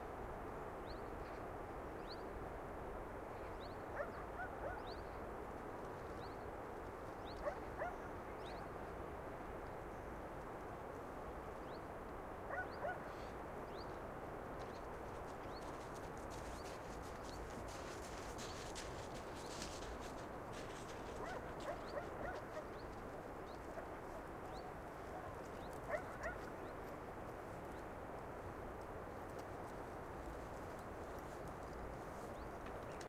1QuietBeachDistantDogsStereo33seconds
Mid-distance waves on pebble beach, Littlehampton, Sussex, UK. Recorded with Neumann 191 mic onto HHB PortaDAT.